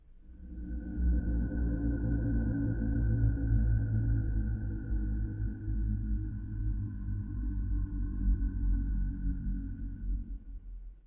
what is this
Making a quiet "Aahh..." Noise, and changing the paulstretch and pitch in Audacity. That's how I did this sound.
Hey, I've moved my account.
Atmosphere, Evil